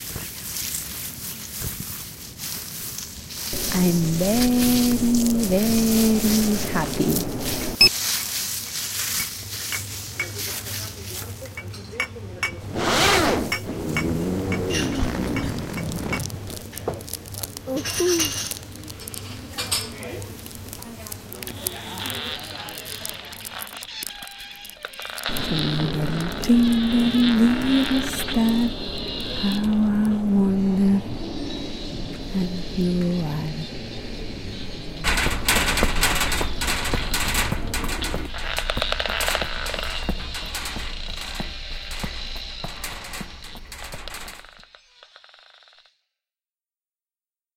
Caçadors de sons - Sons de por
A workshop in which we are introduced to some tools and methodologies of Sound art from the practice of field recording. The sounds have been recorded with portable recorders, some of them using special microphones such as contact and electromagnetic; the soundtrack has been edited in Audacity.
Fundacio-Joan-Miro, Cacadors-de-sons